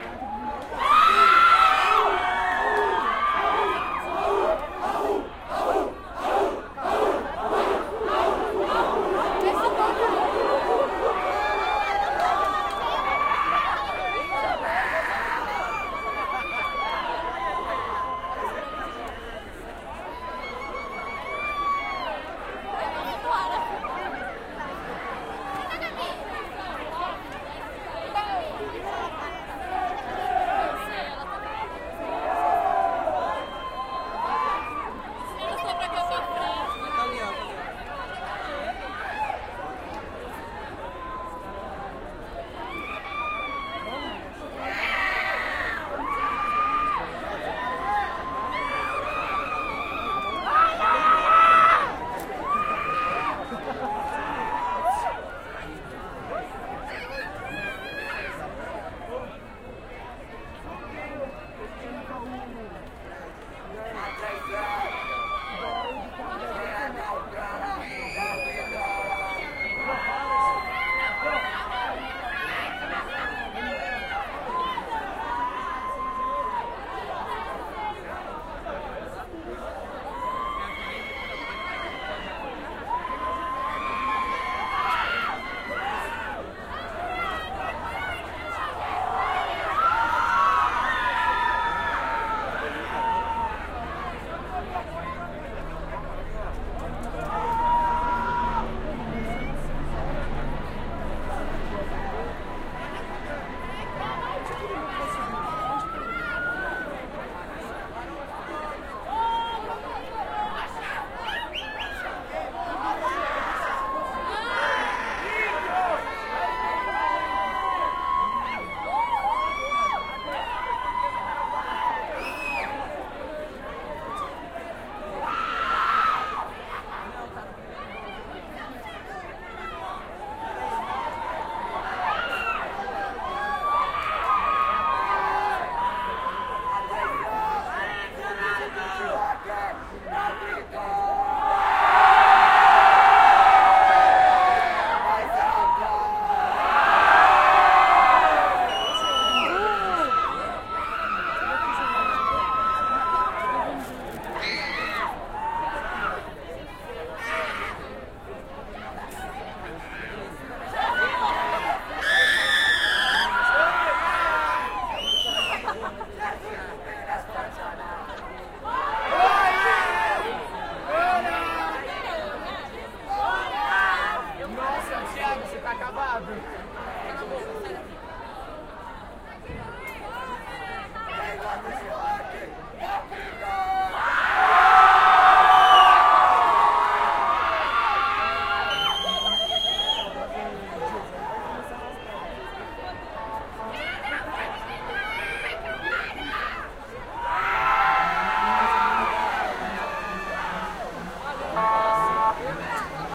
In the afternoon of 1st of November, in the center of Sao Paulo. Thousand of young people, dressed as Zombies and Skeletons having a crazy Party.
carneval, field-recording, binaural-recording, crowd, clap, cheer, cheering, group, screaming